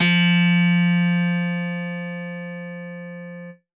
1051 HARD BASS-F4-TMc-
An original electric bass emulation synthesized in Reason’s Europa soft synth by Tom McLaughlin. Acts as loud samples with MOGY BASS as medium, and MDRN BASS as soft samples in a velocity switch sampler patch.
bass, chromatic, electric, emulation, multisample